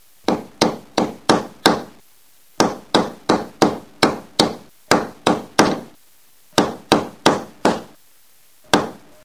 My friend thumping on the other side of a wall with a hammer on a block of wood to represent imaginary sounds heard by someone having an imaginary breakdown.